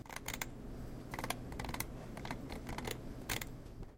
sound 19 - mouse wheel
Sound of the scroll wheel of the computer's mouse
Taken with a Zoom H recorder, near mouse.
Taken in a UPF Poblenou computer room.
computer, UPF-CS14, pc, campus-upf